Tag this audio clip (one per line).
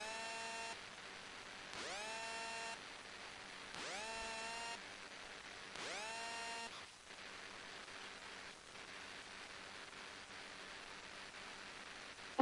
ringing
emp
cell
pulse
phone
electronic
vibrate
magnetic
field-recording